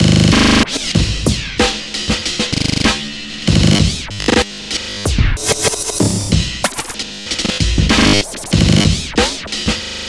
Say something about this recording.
- REC 190bpm 2020-08-23 12.03.31
loops, drum, beats, breaks, amen